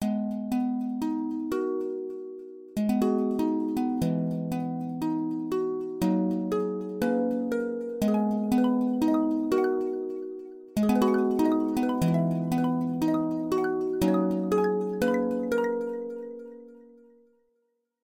Pretty Pluck Sound
Made on FL5 back in 2005 for one of my first rap beats.. used a pluck instrument on FL5 to create this loop . Has a harmony on the second time around on a higher octave.
Beautiful, Emotion, Emotional, FL5, Fruity, Good, Hip, HipHop, Hop, Loops, Pluck, Pretty, Rap, Sound